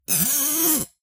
BS Zip 1
metallic effects using a bench vise fixed sawblade and some tools to hit, bend, manipulate.